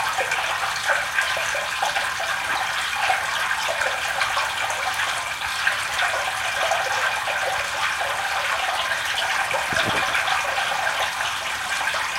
Water in Sewer 4
This is a Heavy to Medium Close perspective point of view or water running through a storm drain. Location Recording with a Edirol R09 and a Sony ECS MS 907 Stereo Microphone.